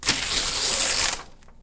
Paper Ripping
A short recording of ripping paper recorded with MXL 840 stereo mics.
destroy, paper, rip, tearing